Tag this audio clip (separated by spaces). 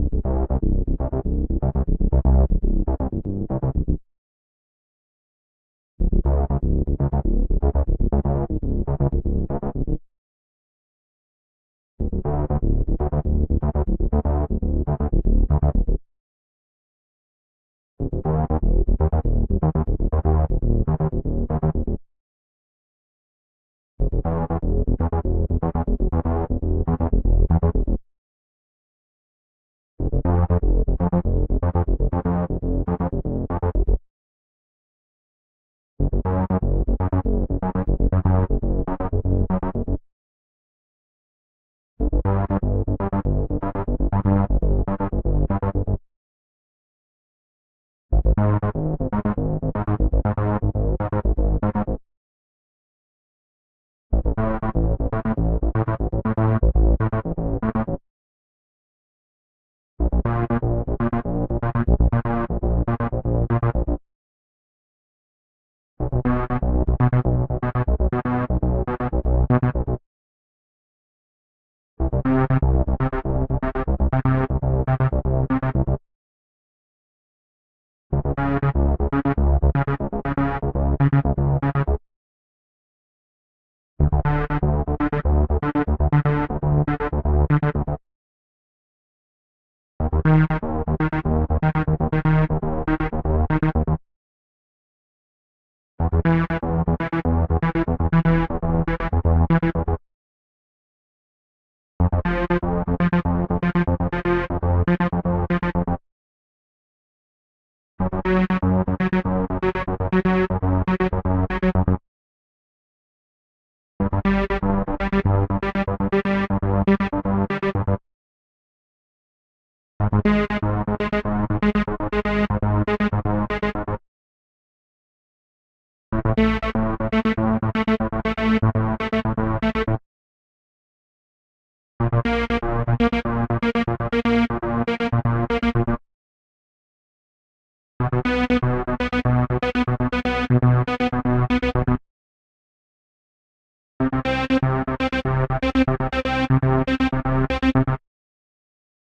synth synthesis house dance electro bounce dub-step effect acid bassline analog loop ambient retro sound techno bass club trance electronic